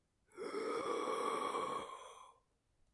hard breath
This sound could be use for zombie breath or something.
Software used: Audacity
Recorded using: Behringer C-1U
Date: 2017-06-23
hard, horror